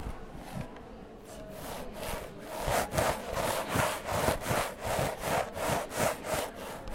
PIES PISANDO ROCAS-MONO-021
alguien esta pisando unas rocas rapidamente.